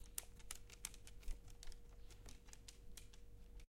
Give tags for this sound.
clinking; doggy; hardwood; wood; paced; nails; variation; steps; tapping; squeaking; walking; floorboard; doggo; OWI; foot; floor; animal; footsteps; creaky; hard; slow; walk; dog

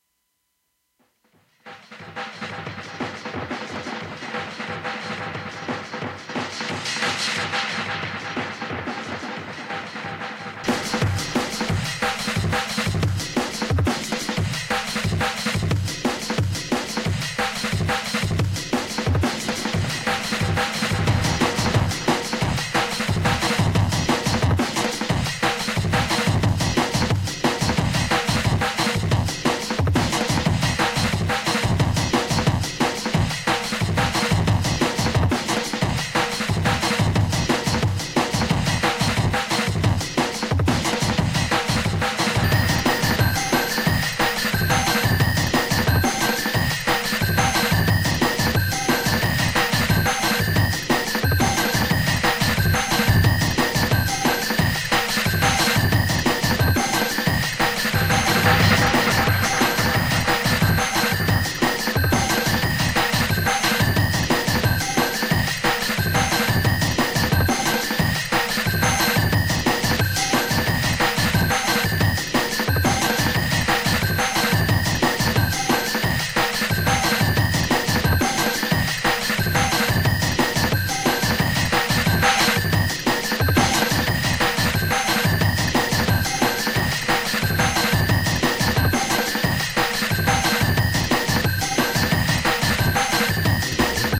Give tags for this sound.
beat; pup

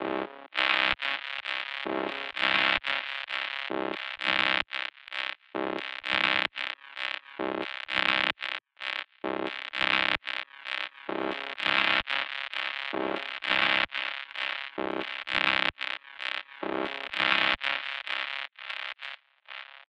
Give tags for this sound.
bpm; distortion; experimental; delay

